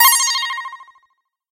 You've found an item!
This sound can for example be used in games, for example when the player has finally found the item that they've been searching for for so long - you name it!
If you enjoyed the sound, please STAR, COMMENT, SPREAD THE WORD!🗣 It really helps!
box, chest, find, found, item, loot, mystery, mystery-box, open, pick, picking, pickup, powerup, quest, reward